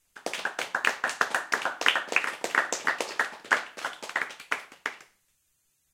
Applause - 5/6 persons - 2
A small group applauding.
{"fr":"Applaudissements - 5/6 personnes - 2","desc":"Un petit groupe applaudissant.","tags":"applaudissements groupe"}
clap, audience, applause, fast, group